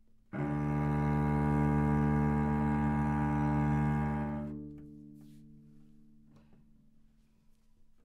overall quality of single note - cello - D2
Part of the Good-sounds dataset of monophonic instrumental sounds.
instrument::cello
note::D
octave::2
midi note::26
good-sounds-id::1942
dynamic_level::mf
cello, D2, multisample, neumann-U87, single-note